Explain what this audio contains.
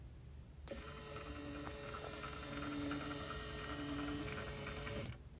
Spinning motor; close
Light projector motor spinning
metallic, motor, spinning